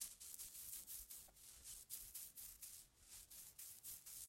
Sonido de rascar pelaje